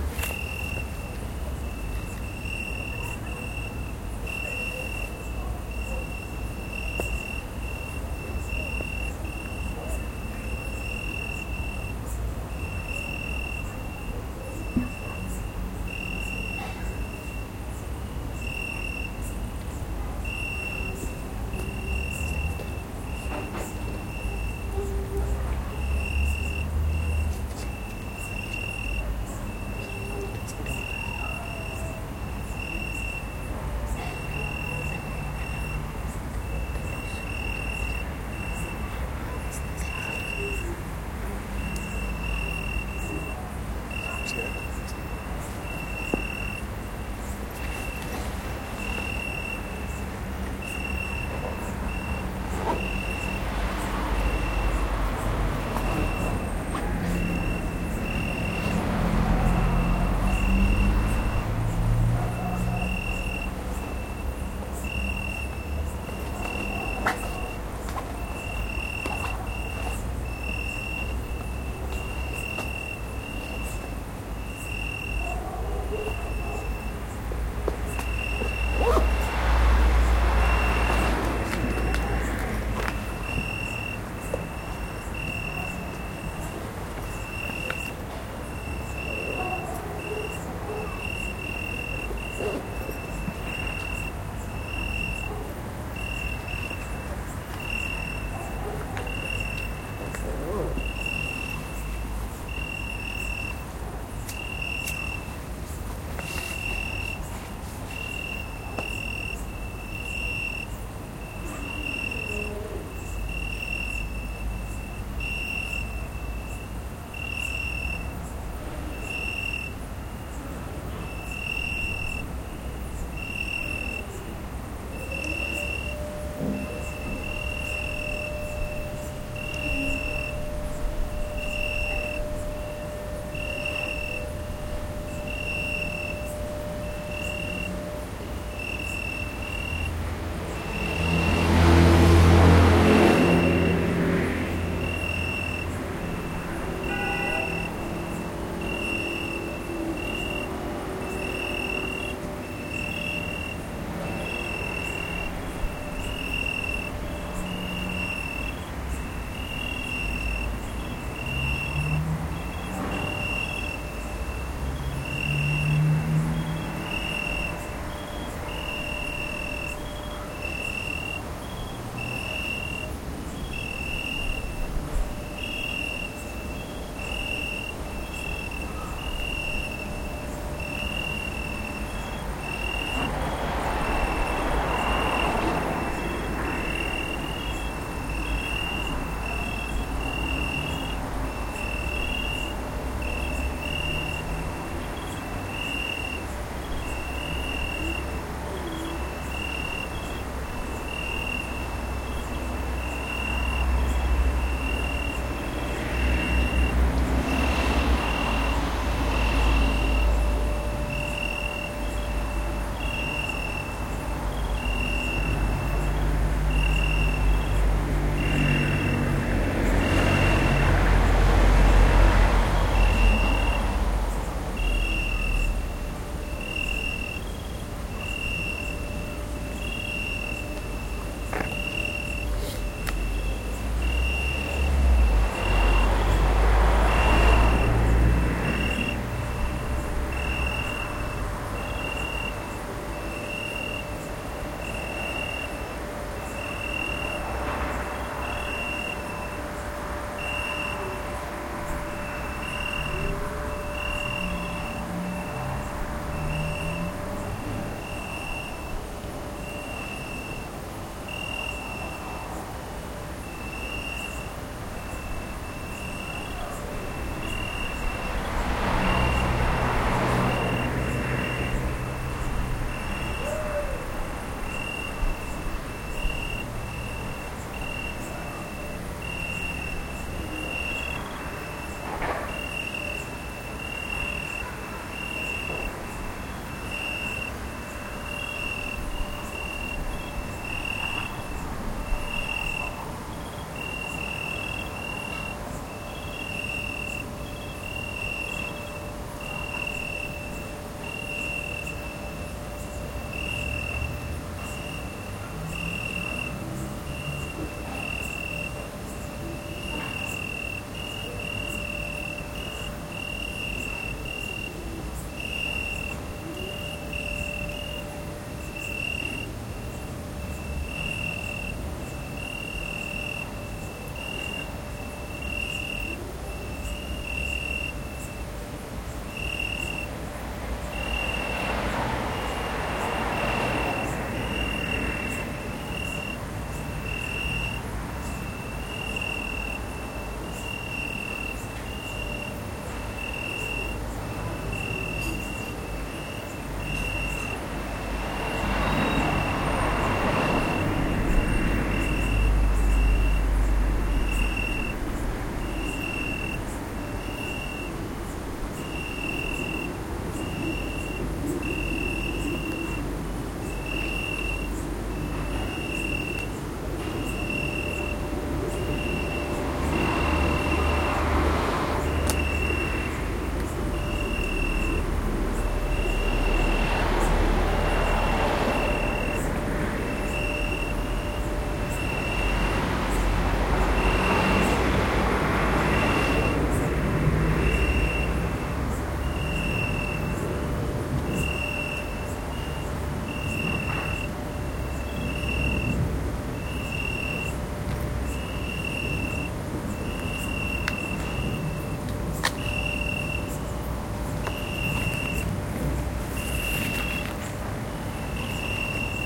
Crickets in Kotor, Montenegro with human ambient sounds in the background. Recorded at the evening, 25/9 -2014.